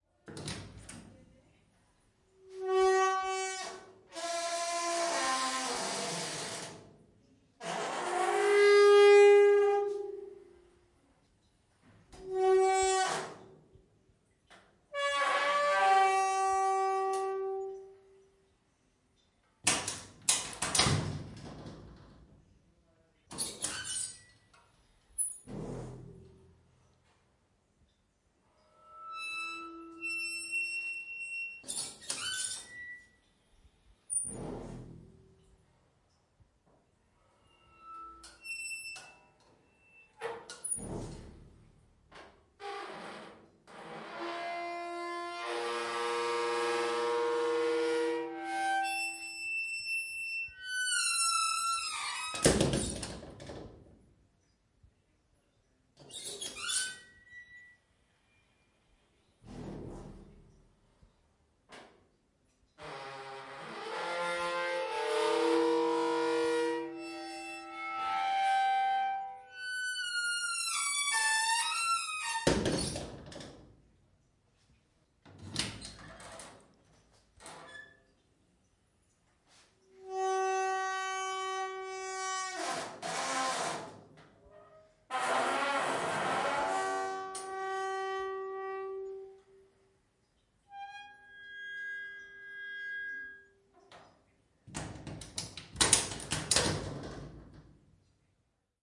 squeaky-door
Opening and closing squeaky door at the toilet
cacophonous, close, closing, door, open, opening, squeak, squeaky, toilet